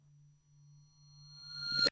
Sound for scifi movie.